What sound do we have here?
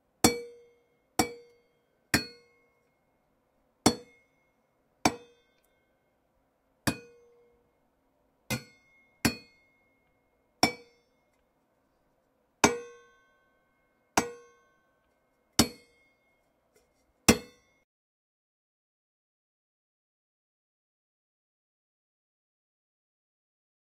Metal Tin Hit bang
banging a metal cup
bang, hit, metal, tin